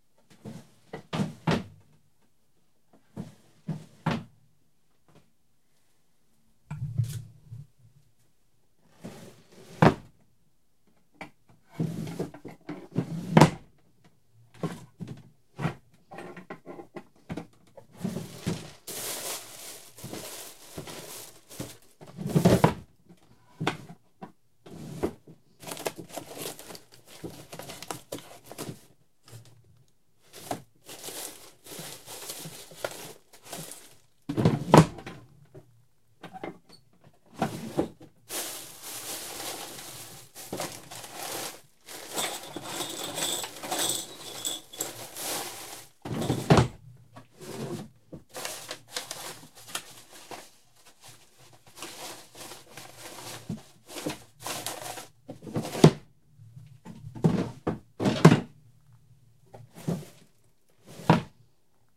Opening and closing wooden drawers, searching for something. Mono recording.
close, drawer, drawers, looking, open